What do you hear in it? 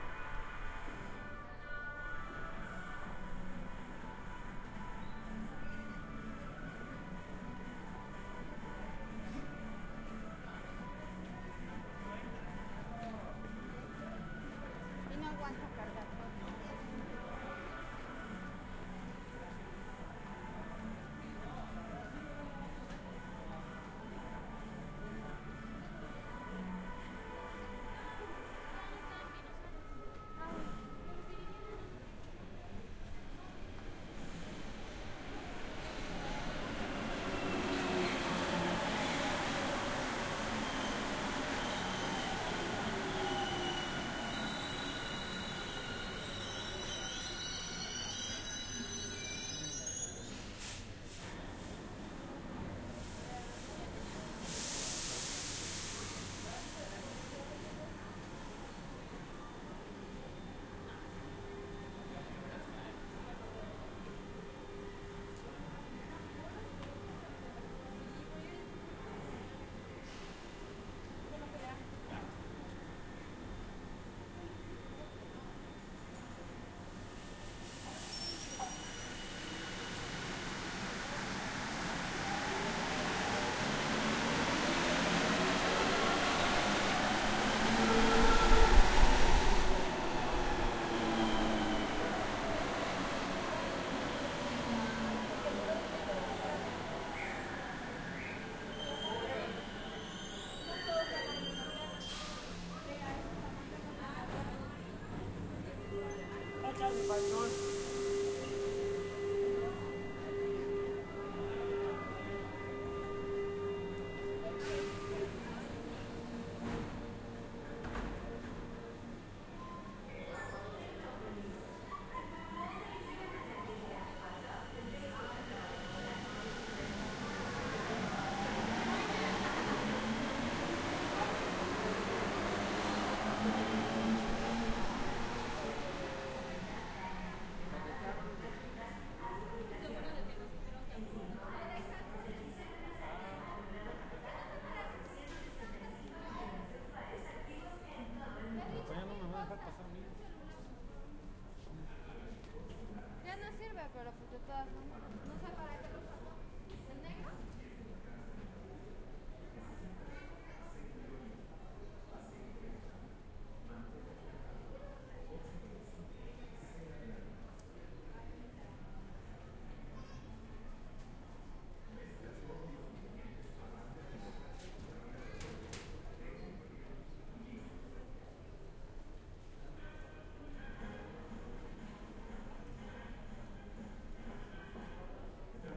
Andén Metro DF México
Paso de tren en andenes del metro Cd de méxico
de, Metro, train, station, Mexico, Cd, Anden, platform